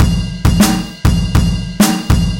100 Studio C Drums 01
dirty, synth, drums, crushed, digital, bit